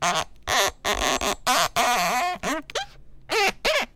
One in a series of eight, rubbing a knife around on some cabbage to create some squeaking, creaking sounds. This might work OK for a creaking door or maybe even some leather clothes. Recorded with an AT4021 mic into a modified Marantz PMD 661 and trimmed with Reason.
creak, door, foley, squeak